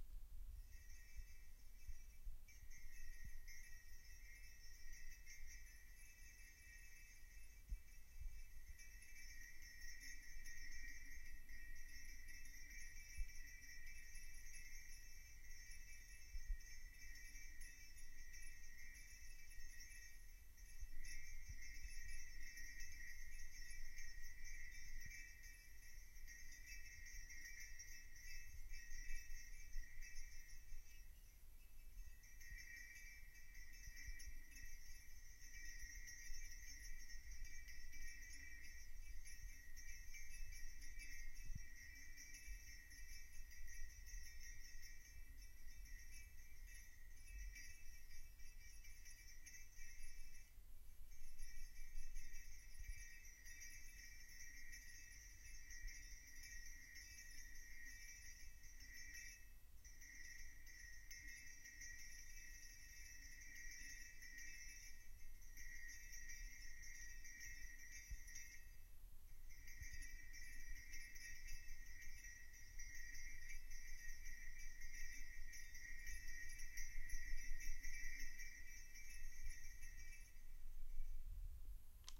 old bulb
Shaking an old lightbulb. A wind-chime like sound.
glass, lightbulb, shake